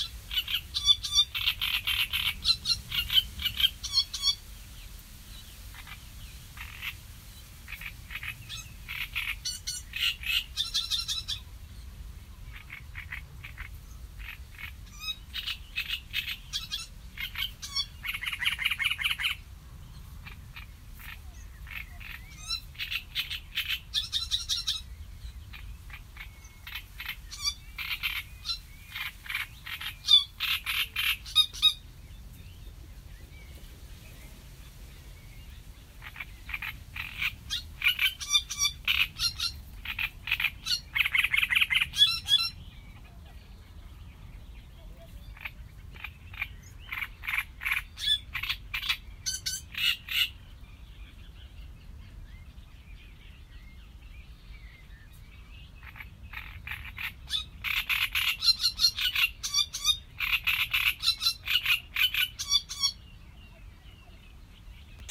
A sedge warbler I recorded with my iPhone SE at Störmthaler See near Leipzig.